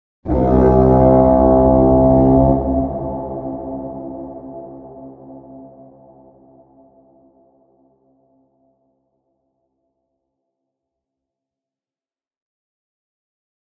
BIG-REVERB-WARHORN
I edited a really simple sound of a horn, and added pitch FX and reverb. Here comes the cavalry!
video-game, yell, war-horn, trombone, battle, warcry, call, champion, horn, warhorn, ambience, war